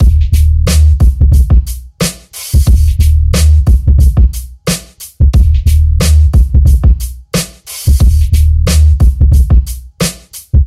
oldskoolish 90bpm
90 bpm oldskoolish hiphop beat, nice and fat with a flat baseline, done by me around 2001.
breakbeat, 90bpm, hiphop, old-skool, fat, loop, hard